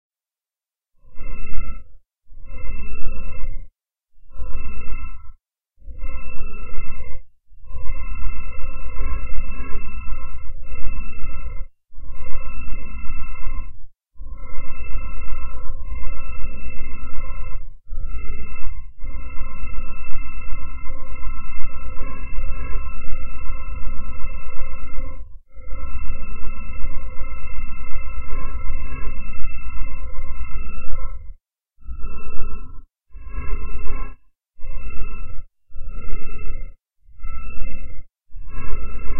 Experimental created by drawing patterns in windows Paint & imported into Bitmaps&Wavs; by. This sort of reminds me of the noises from Ringu when Sadako comes out of the TV set.